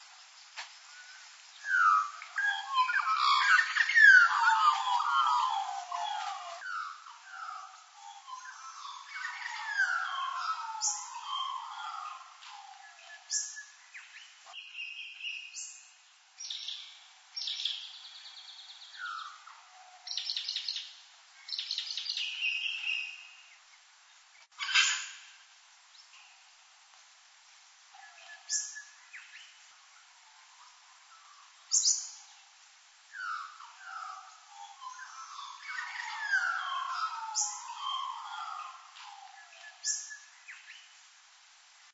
Recorded with a JVC Pro SRC on Fuji Metal Tape using parabolic reflector Birds were about 200 metres distant.